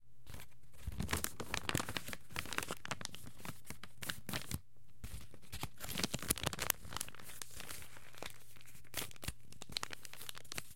Rumpling Paper 02
Someone rumpling paper.
Rumpling, Paper, Rumple